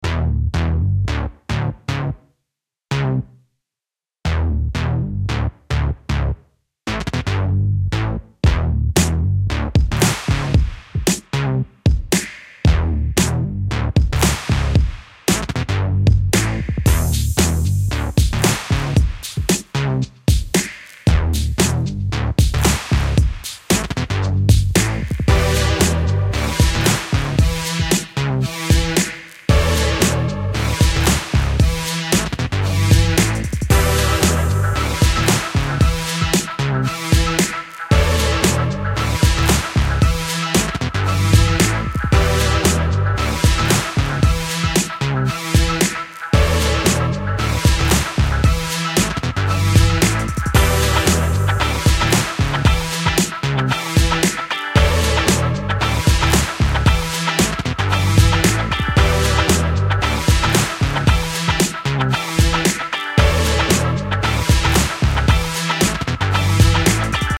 Retro Funk 20.03.2022 1714
Made with Launchpad for iOS. Intended for use in games or videos.
loops, loop, DJ, game